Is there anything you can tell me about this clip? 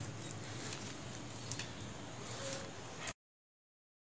patitas tobby
dog pug small
dog, pug, small